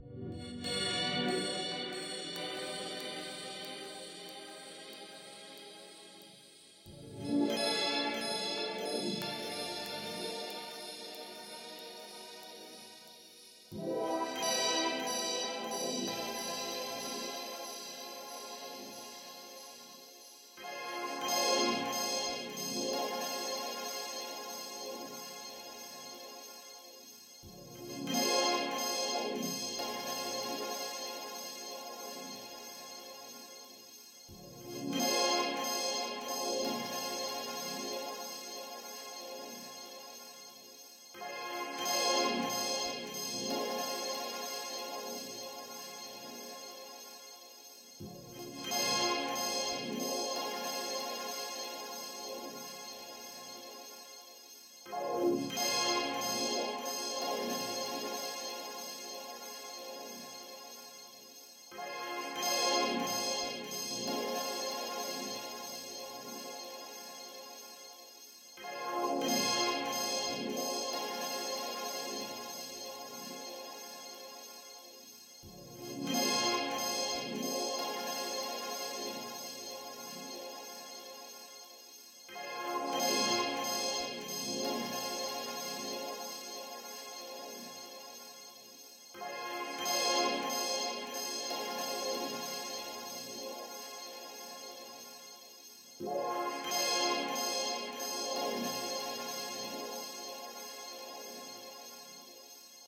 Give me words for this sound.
creepy backround noize
haloween, music, creepy, nozie, backround